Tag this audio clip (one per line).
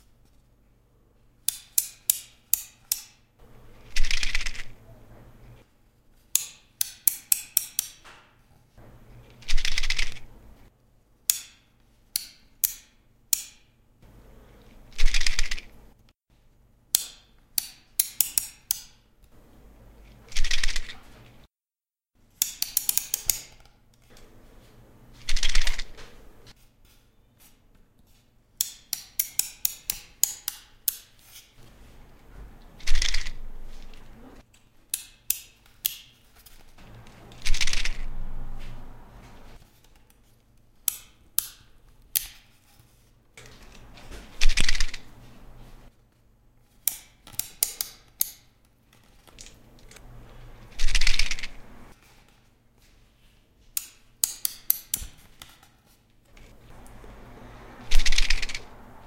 ghibli
apricot
japanese
spirit
nuts
youkai
kodama
kami